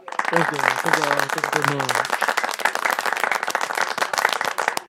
thank you very much, large crowd applause
A man saying "Thank you, thank you, thank you very much" in a deep voice (me). This version has a large crowd applauding in the background (all me, layered in Audacity). Recorded using a Mac's Built-in Microphone.
elvis, very, applauding, voice, applause, claps, much, applaud, clap, clapping, deep, crowd, thank